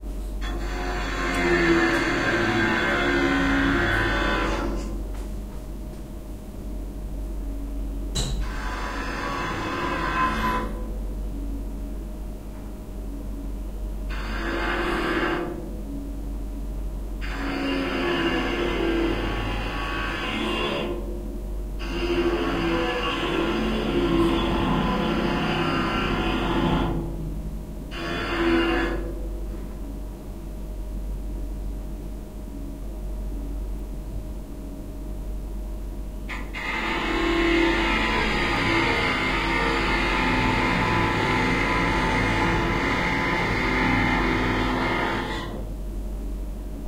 ofiice construction
Rumble in the ofiice. Construction works behind the wall.
Recorded at 2012-11-02.
AB-stereo
clastter, construction, growl, ofiice